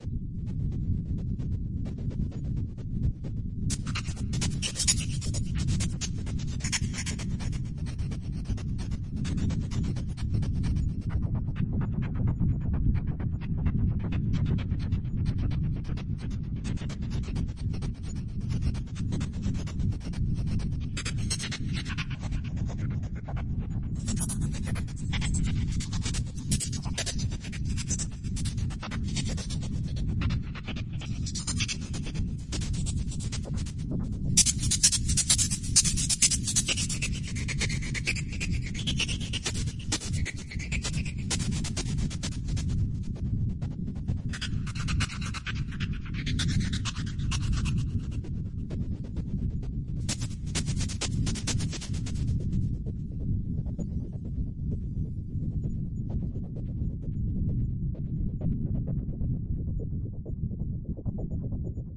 Day 13 13th July zagi2 strange glitch groove
Day 13. You can barely tell that it's the orginal it's so mangled.
but its paulstretched in some way that makes it extremely glitchy.
Edited in Audacity.
This is a part of the 50 users, 50 days series I am running until 19th August- read all about it here.